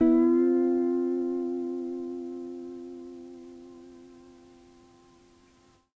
Lo-fi tape samples at your disposal.